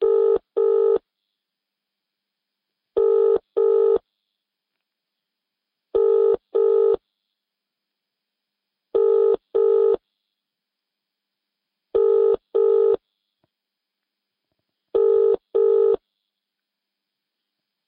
A UK phone tone 6 times.
dial, twice, uk-phone